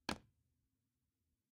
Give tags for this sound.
Pan Hit Kitchen Percussion FX Wood Domestic Knife Metal Fork Loop Hits Metallic Saucepan Spoon

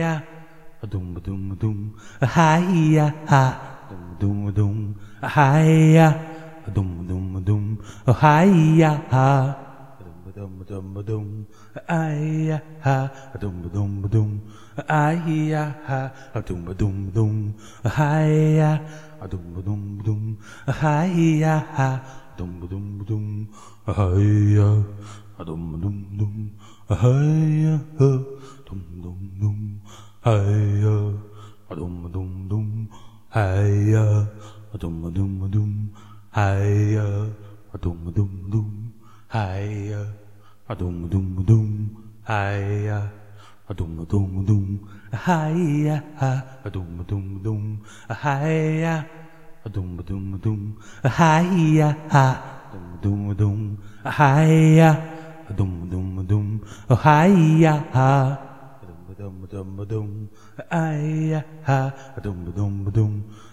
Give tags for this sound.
vocal microphone vst

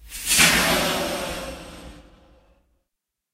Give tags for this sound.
balloon inflate

